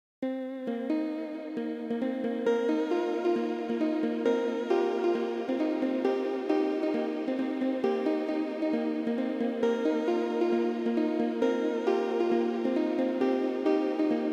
Spire vsti.
Minor something, can't remember sorry just found it laying around on my pc unused.
needs some eq :P